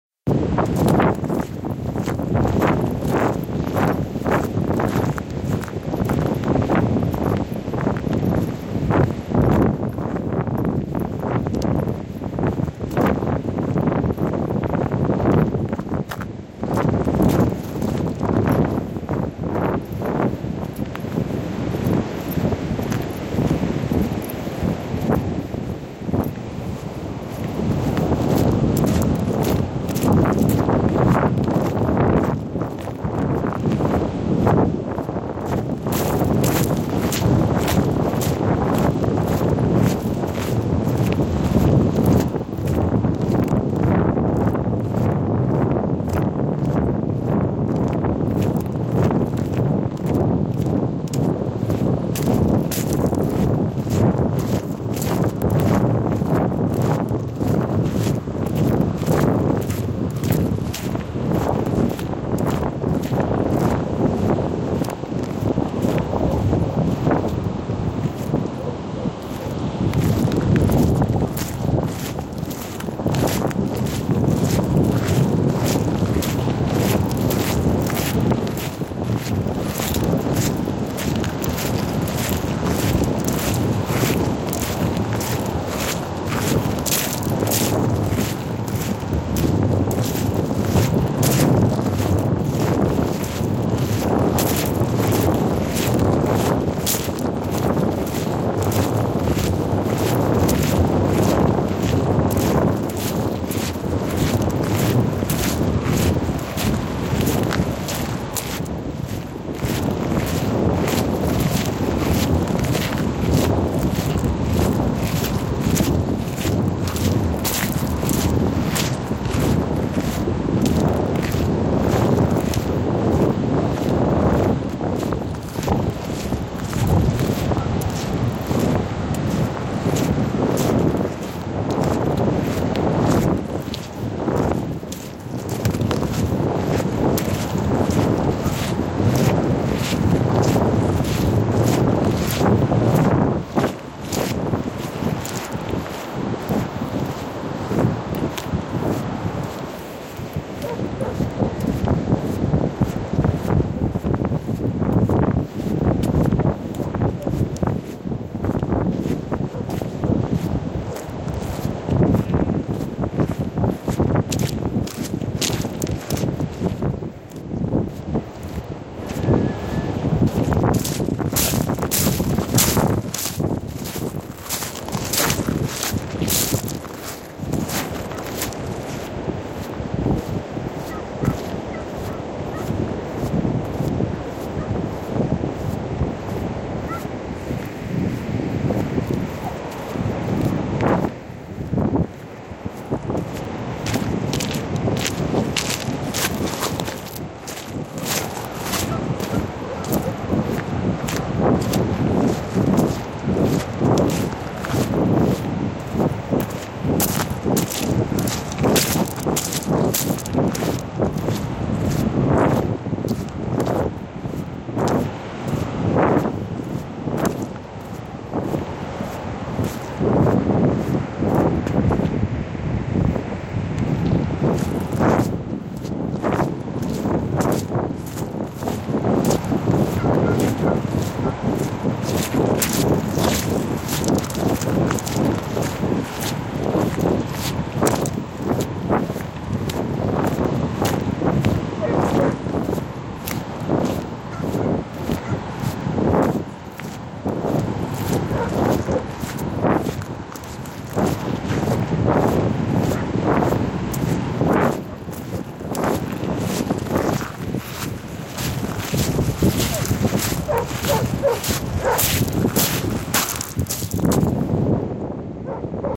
Walking on a windy day at Camber Sands
The recording consists of me taking a walk on a windy day at Camber Sands, East Sussex. Sounds of footsteps, seawaves and the wind can be heard. This recording is one of a series undertaken for 24/7 Nature Radio.
Stephen Palmer.